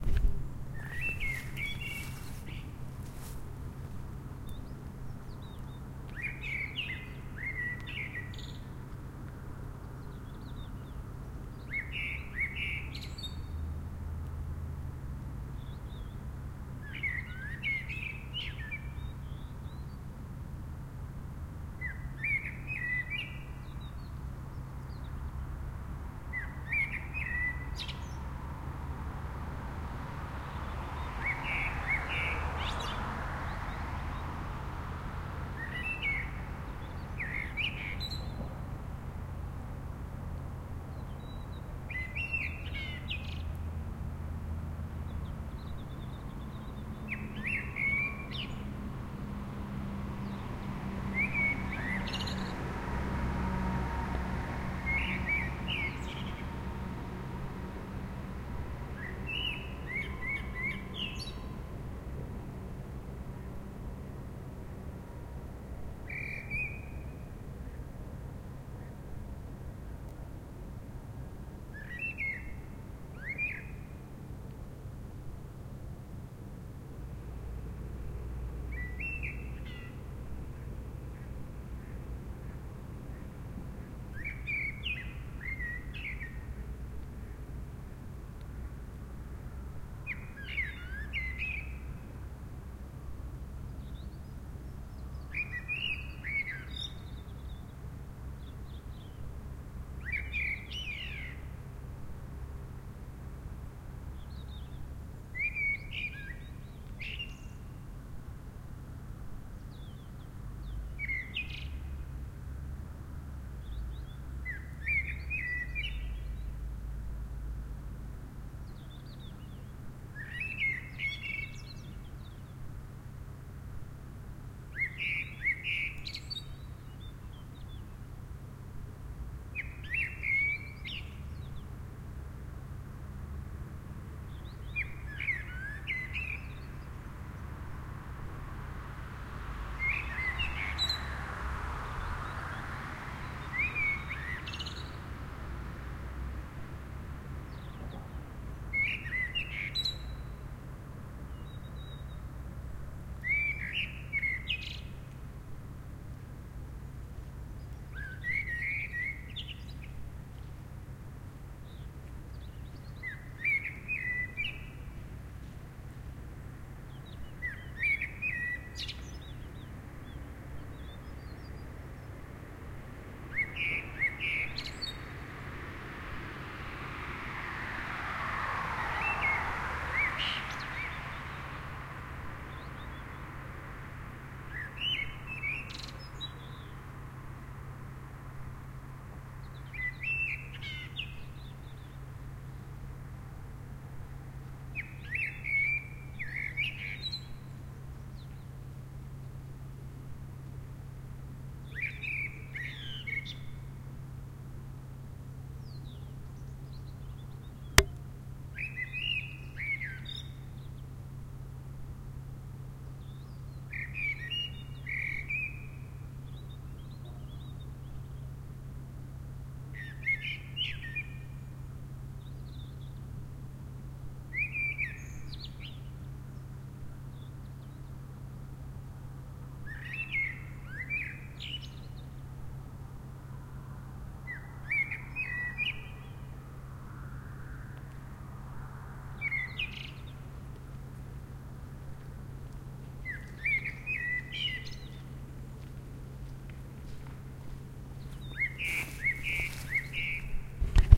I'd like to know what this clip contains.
Somewhere in suburban region of Budapest, february of 2015, 4:30' AM.
The first blackbird is singing.